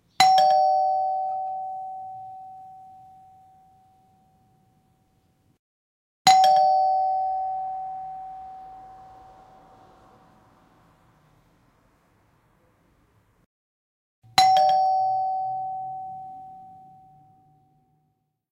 doorbell apartment 3 times
apartment, doorbell, ring